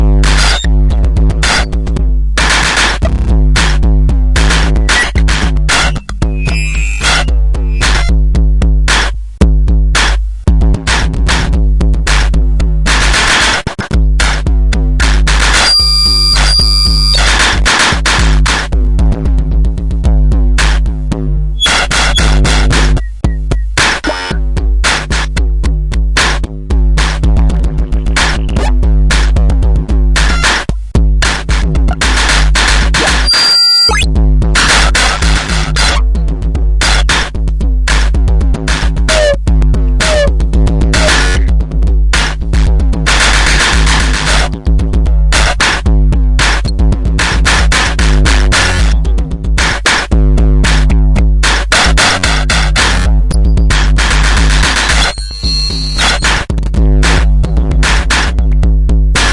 cut up drum sequence sliced with a pure data patch and accentuated with squeaky filters on random occasions